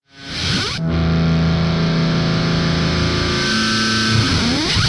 Weird guitar noise
Lots of treble covering a reversed pickscrape which grows into artificial feedback to finish. This sound was generated by heavily processing various Pandora PX-5 effects when played through an Epiphone Les Paul Custom and recorded directly into an Audigy 2ZS.
artificial-feedback, reversed-guitar-scrape